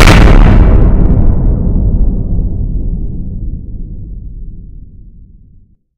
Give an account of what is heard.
Another simple explosion (not real).
blow, effect, explosion, fight, fire, military, shockwave, wave